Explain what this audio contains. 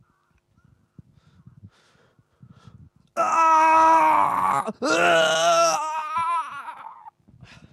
Painful sounds and death